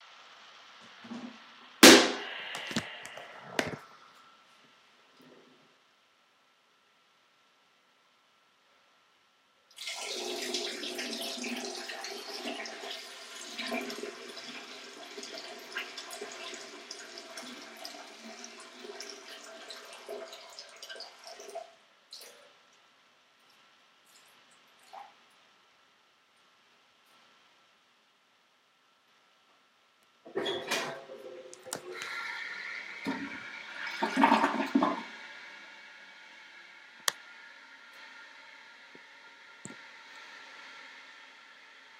use the restroom
Using the restroom